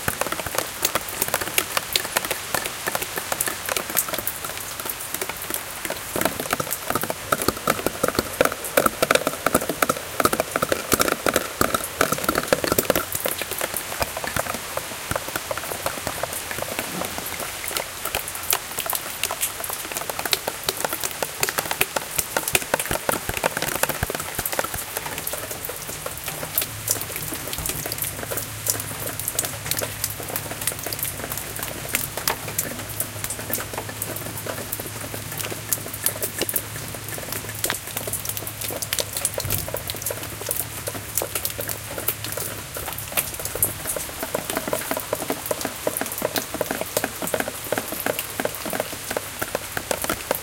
Rain dropping on various textures, recorded with a Zoom H5.
dropping, weather, drops, wet, texture, rain